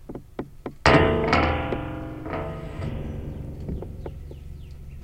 Contact mic on a door with a spring